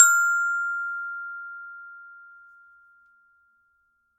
Samples of the small Glockenspiel I started out on as a child.
Have fun!
Recorded with a Zoom H5 and a Rode NT2000.
Edited in Audacity and ocenaudio.
It's always nice to hear what projects you use these sounds for.
campanelli, Glockenspiel, metal, metallophone, multi-sample, multisample, note, one-shot, percussion, recording, sample, sample-pack, single-note